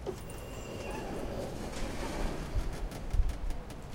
Sound caused by the openning of an automatic door.
campus-upf, door, automatic, UPF-CS12, Tanger